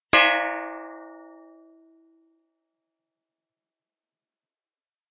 A stereo recording of a fabricated steel grille struck by a rubber mallet. Rode Nt 4 > FEL battery pre amp > Zoom H2 line in.
clang, metal, metallic, percussion, steel, stereo, xy